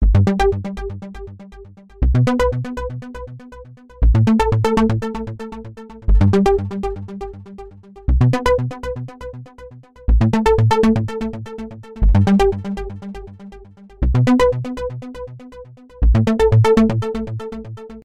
Loop Electro
This sound was made with Fl Studio, simple and nice to cover some drums.
FX, Loop, EDM, House, Electro, Dance, Minimal